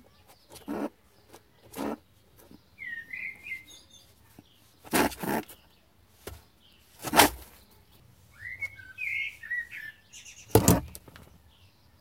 Rabbit snarls and growls
An aggressive female rabbit snarling at me a few times. Not as clean as I had wished, but maybe some of you still have use for this.